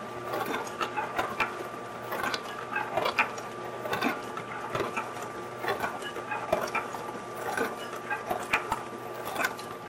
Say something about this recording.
dish-washer-00
Dish washer in operation
dish, washer, cleaning